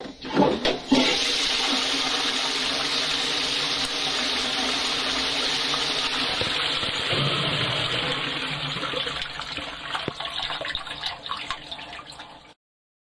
WC GELUID SABAMVRIJ
geluid wc noise
geluid, noise, wc